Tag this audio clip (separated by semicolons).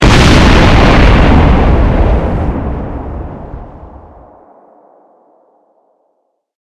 attack; cannon; distruction; explode; explosion; firearm; harsh; layered; loud; processed; rocket; war; weapon